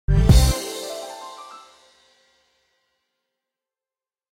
Game win
I made this in fl studio, its a sound for level completion